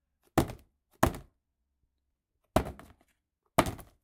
Cardboard being droped sound mixed in Audicity.